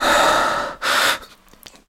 Breath Scared 13
A male agitated scared single breathing sound to be used in horror games. Useful for extreme fear, or for simply being out of breath.
gaming, frightening, rpg, gamedev, horror, fear, fantasy, game, games, frightful, indiedev, gamedeveloping, sfx, terrifying, scared, male, epic, indiegamedev, breathing, scary, breath, videogames, video-game